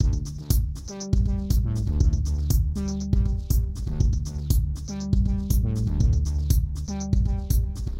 Pattern1var2 Loop2
Just playing around created this loop in Reason 8.
Contains a simple drum pattern that was mashed up through some distortion effects and a few simple notes from a monophonic pseudo-analogue synth.
120 bpm.
120bpm, beat, loop, pseudo-analogue, Reason-8